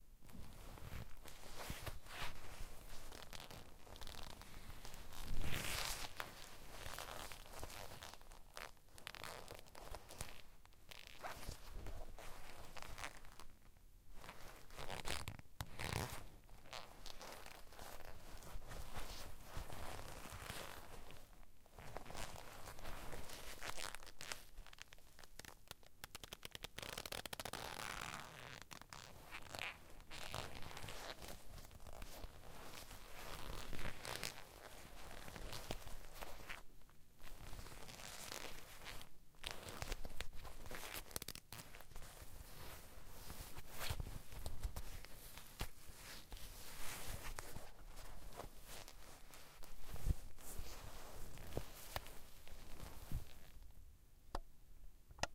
This is a Leather Rubbing Foley Sound, created using a Tascam DR07mk2 and...Leather!. Long recorded track enables users to select a certain length in the track and accustom it to their film or project. Some credit wouldn't hurt. Good luck filming.
Specs:
Tascam Dr07mk2
Stereo X/Y Pattern
low noise
together, sound, smashing, scratching, leather, rubbing